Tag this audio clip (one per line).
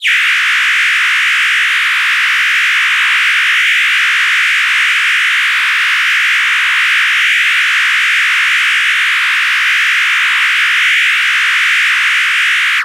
synthetic
cinematic
granular
space
evil
foley
synthesis